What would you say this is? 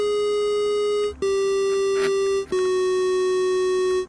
Dramatic Square
Classic 8 bit game sound ds
bit, classic, sounds, 8, game